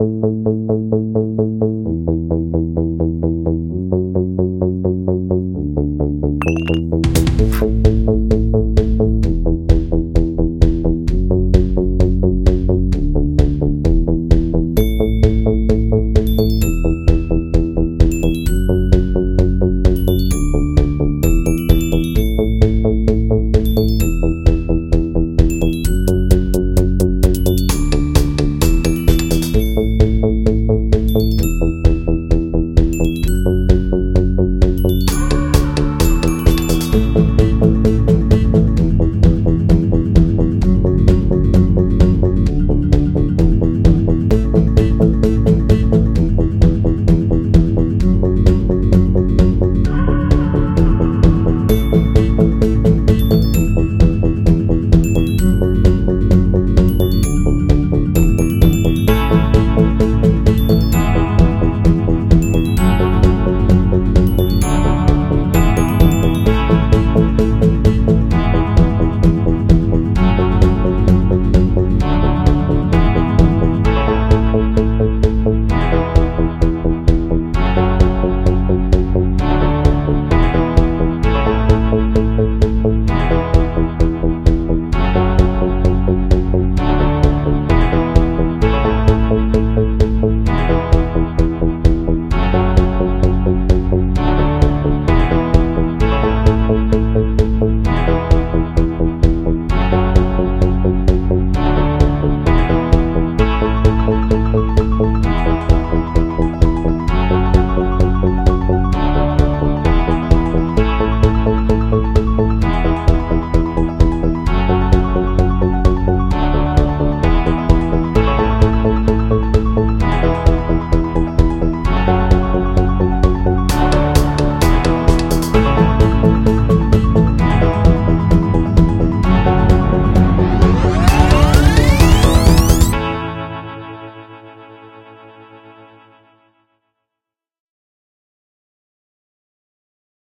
Inspired by the hustle and bustle of a trip to Europe, I made such a short project. After listening for a while, it sounds like advertising music. Maybe it seems like a cleaning product or an application can be used to advertise. For those who want to develop, I can share the sample audio files I prepared for the project. I hope you enjoy listening.